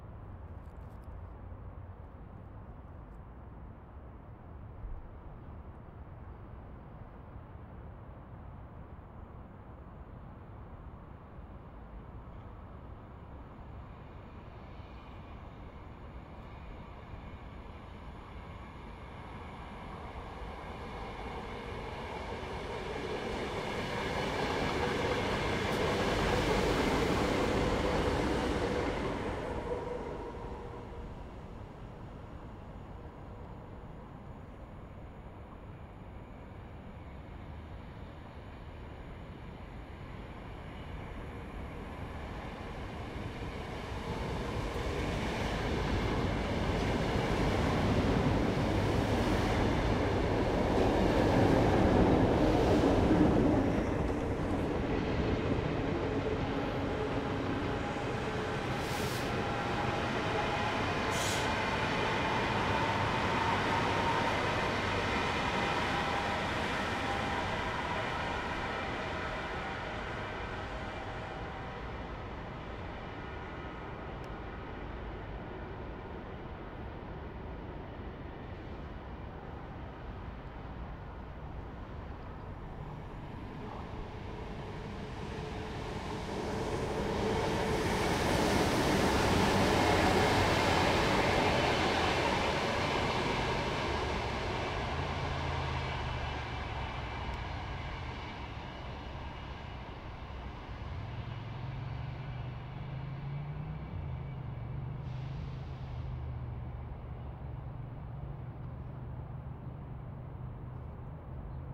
BLODIGT AT06 1
Recorded on a distance of about 10m of the railway using a MKH60 and a SoundDevices 744T HD recorder.
city-noice, commuter-train, railway, railway-ambience, trains